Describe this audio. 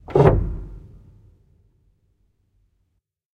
Piano Pedal Pressed / Organ Pull Stop Sound
Old piano pedal is depressed, heavy wooden mechanism sound.
This sound is quite churchy and could have a lot of uses - It sounds like someone pulling out a wood stop on an organ, a drawer, door, or a bassy wooden toggle switch.
100+ year old upright piano, microphone placed directly inside. (Sample 2 of 4)
slam, opening, release, open, close, depress, wood, closing, drawer, wooden, bass, switch, press, organ-pull, piano, old, door, toggle, organ, church, pedal, mechanism, echo